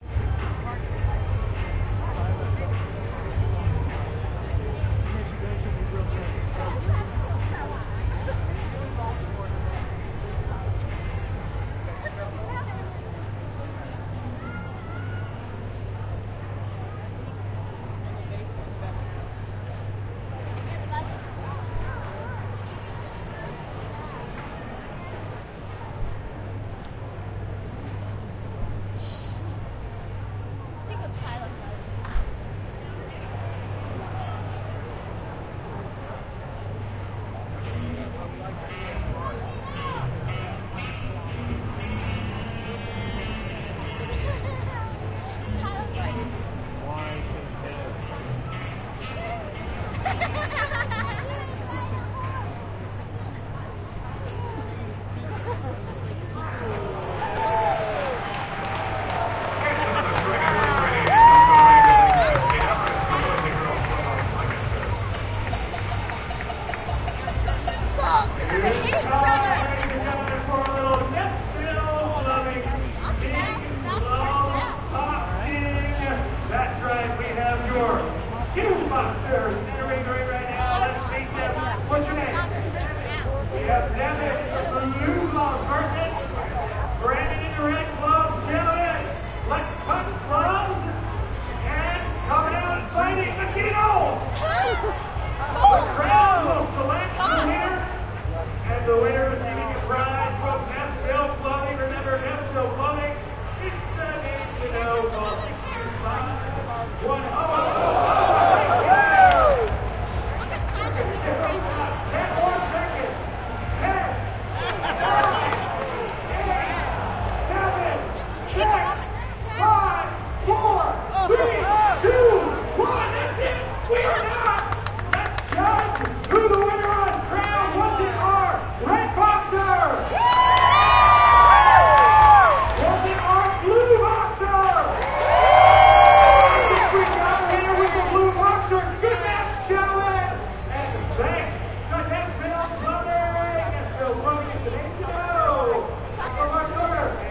The chatter of the crowd and the announcer's voice at a minor league baseball game in Lancaster, PA, USA.
During this segment an inning ends and some between-inning entertainment occurs- "big-glove" boxing. It's a couple of kids with enormous boxing gloves fighting for about one minute.
You can hear two girls chatting about the ball club mascot, "Cylo", who clowns around with the audience.
As for the actual game, there may be the sound of a hit ball early in the recording.
ambience, ambient, baseball, field-recording, league, minor, stands